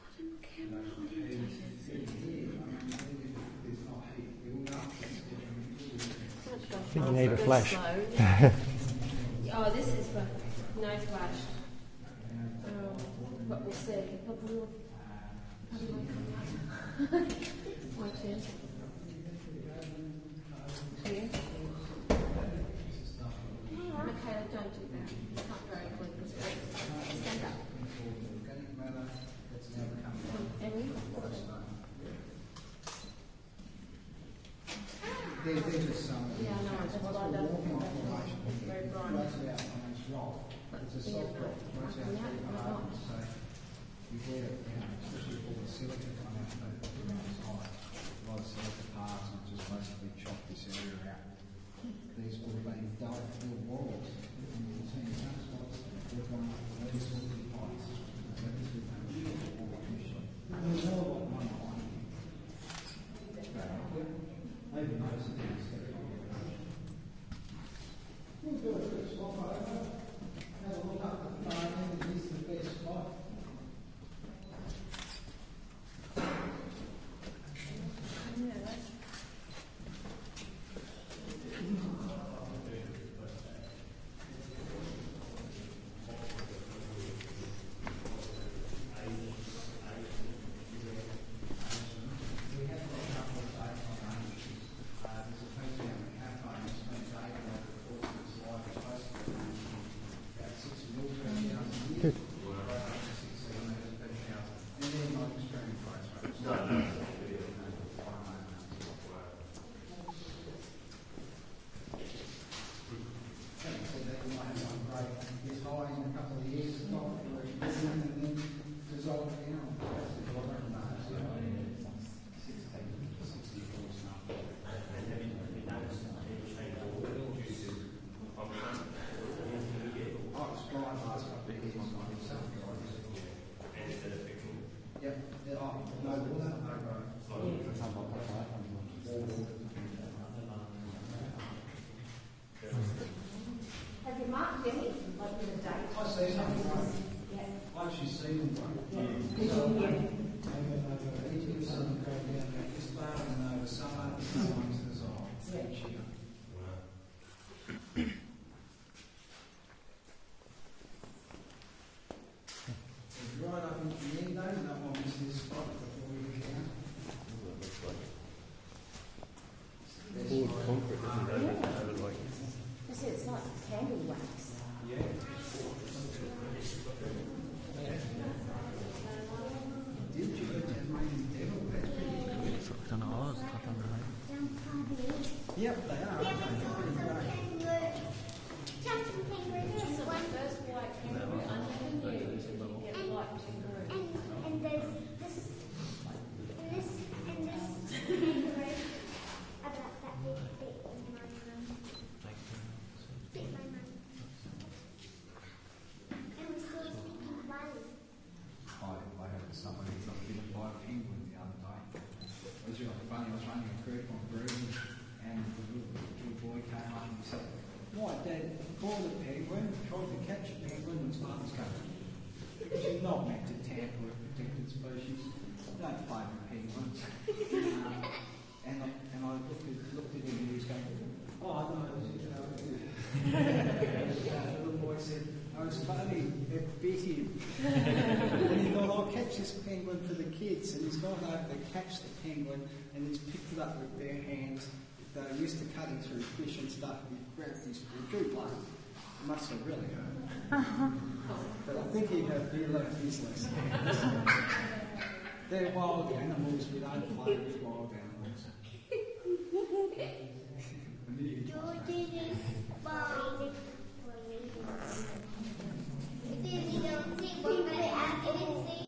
Newdegate Cave 3
In the Newdegate cave (Hastings, Tasmania). Recording chain: Panasonic WM61-A capsules (mics) - Edirol R09 (digital recorder)
newdegate-cave, cave, tunnel, binaural, underground, cave-tour, field-recording, hastings-caves, tasmania